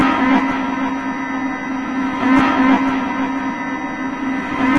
memexikontetrapluck HFRMX
Yeah, it's so screwed up you can barely tell it was from that sample. That ringing in the back is a stretched and pitched piece of the same sample. I was trying to make a dub loop, but this is what I got. Really freaky.
creepy, remix, bizzare, weird, experimental, strange